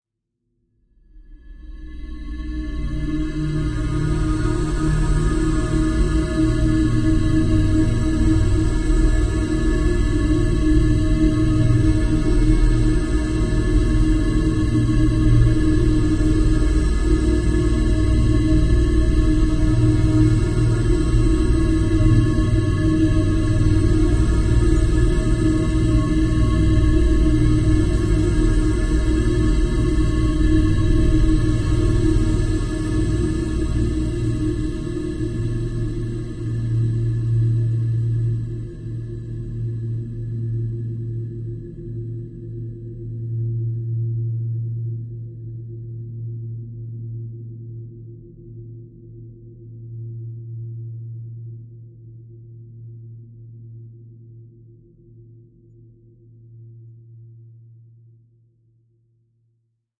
LAYERS 021 - N-Dimensional Parallel Space-28
LAYERS 021 - N-Dimensional Parallel Space is an extensive multisample packages where all the keys of the keyboard were sampled totalling 128 samples. Also normalisation was applied to each sample. I layered the following: a pad from NI Absynth, a high frequency resonance from NI FM8, a soundscape from NI Kontakt and a synth from Camel Alchemy. All sounds were self created and convoluted in several way (separately and mixed down). The result is a cinematic soundscape from out space. Very suitable for soundtracks or installations.
divine, multisample, soundscape, cinematic, space, pad